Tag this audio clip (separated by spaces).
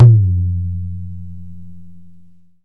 bol drum hindustani tabla